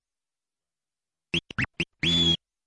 Scratch Whistling 2 - 1 bar - 90 BPM (swing)
Acid-sized sample of a scratch made by me. Ready for drag'n'drop music production software.
I recommend you that, if you are going to use it in a track with a different BPM, you change the speed of this sample (like modifying the pitch in a turntable), not just the duration keeping the tone.
Turntable: Vestax PDX-2000MKII Pro
Mixer: Stanton SA.3
Digital system: Rane SL1 (Serato Scratch Live)
Sound card on the PC: M-Audio Audiophile 2496 (sound recorded via analog RCA input)
Recording software: Audacity
Edition software: MAGIX Music Maker 5 / Adobe Audition CS6 (maybe not used)
Scratch sound from a free-royalty scratch sound pack (with lots of classic hip-hop sounds).
scratch, rap, hiphop, dj, hip-hop, scratches, 90, turntable, acid-sized, classic, s, scratching, golden-era